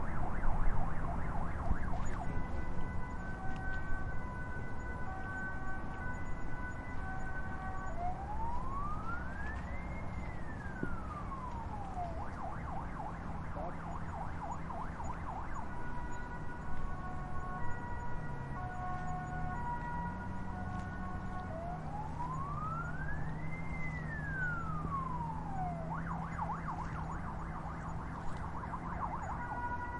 Ambient (sirens)
Sirens far away